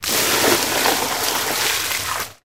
Water Splash 1
Splashing water from a plastic container onto soil and plants.
Recorded with a Zoom H2. Edited with Audacity.
liquid, splash, splashing, squirt, water